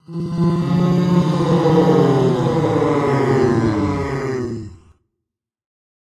Leigon of Demons/Tortured
Put together for a sound design class, basically made the sounds of some demons or some people being tortured in hell.
Yes it used to be me yawning.
hell, fear